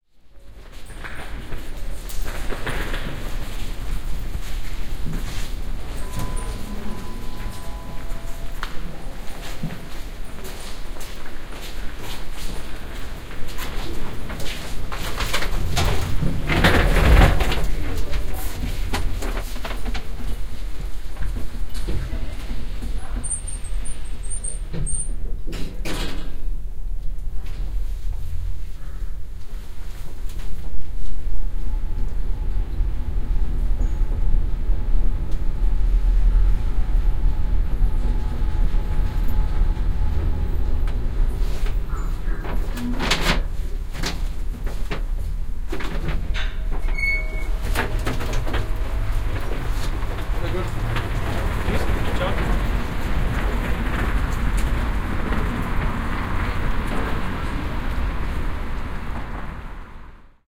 198 BI VIENNA schottering metro elevator 170713 0144
Recording of a elevator ride from a metro platform Schottering, Vienna, Austria.
Binaural recording made with Soundman and Zoom H2n
austria, field-recording, elevator, platform, metro, binaural